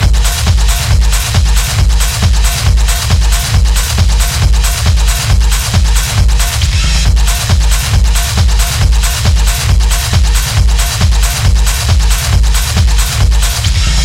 This is my own composition. Made with free samples from the internet, made loops with it, and heavy processing through my mixer and guitaramp, and compressor.
beat, distortion, hard, loop, techno